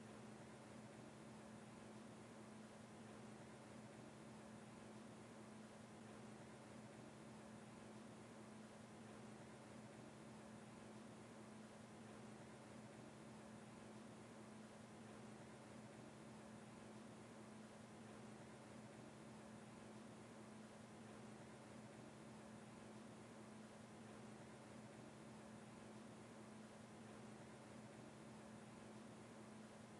Therapist Office Room Tone
Empty room tone of a large living room.
Ambience, Room, Tone